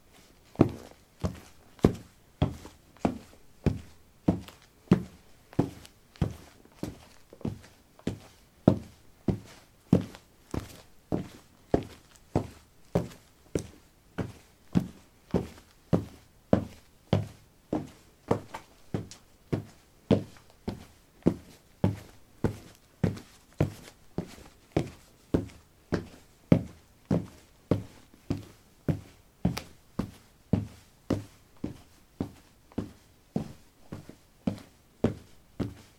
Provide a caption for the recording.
concrete 14a lightshoes walk
Walking on concrete: light shoes. Recorded with a ZOOM H2 in a basement of a house, normalized with Audacity.